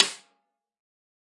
SD14x08Tama-MHP,LSn-Rm-v10
A 1-shot sample taken of a 14-inch diameter, 8-inch deep Tama birch snare drum, recorded with an Equitek E100 close-mic on the batter head, an MXL 603 close-mic on the bottom (snare side) head and two Peavey electret condenser microphones in an XY pair. The drum was fitted with a Remo coated ambassador head on top and a Remo hazy ambassador snare head on bottom.
Notes for samples in this pack:
Tuning:
VLP = Very Low Pitch
LP = Low Pitch
MLP = Medium-Low Pitch
MP = Medium Pitch
MHP = Medium-High Pitch
HP = High Pitch
VHP = Very High Pitch
Playing style:
CS = Cross Stick Strike (Shank of stick strikes the rim while the butt of the stick rests on the head)
HdC = Head-Center Strike
HdE = Head-Edge Strike
RS = Rimshot (Simultaneous head and rim) Strike
Rm = Rim Strike
Snare Strainer settings: